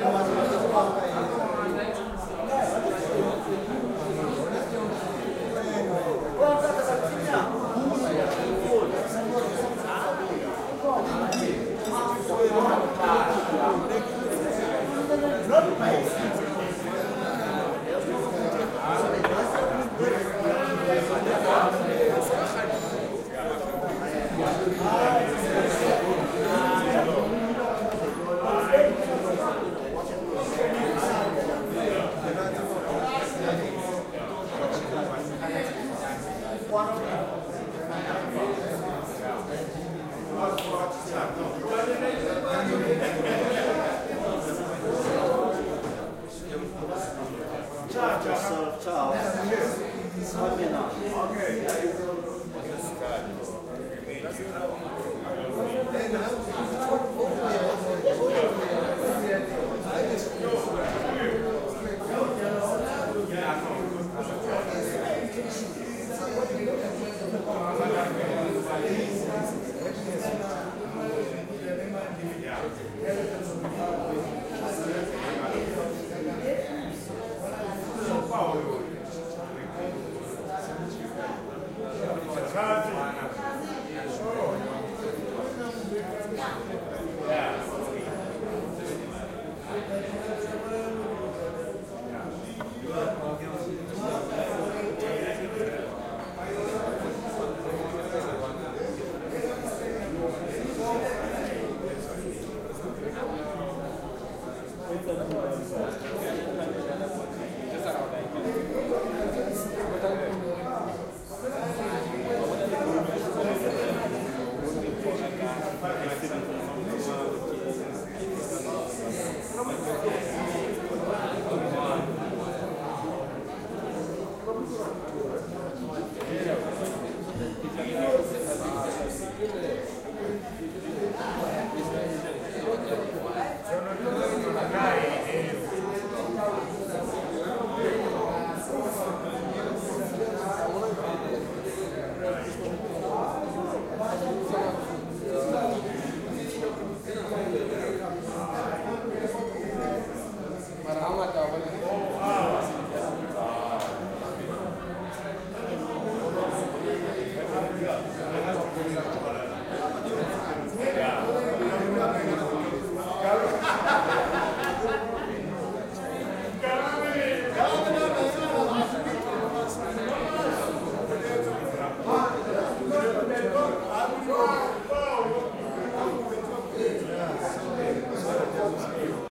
A recording of a Bar in Maboneng, South Africa. Interior Ambience - Night - Busy.
Voices of mostly african males talking and drinking